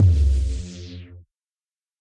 Laser Blast
Sound effect created using Ableton's Operator and Analog synths. Used for lasers in a project of mine, but can be used for other things as well.
alien, blast, energy, laser, sci-fi, sound-design, space, weapon